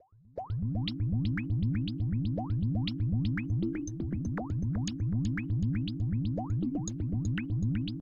robots between us 5
sound effet like water blibs in an acme engine room....ot whatever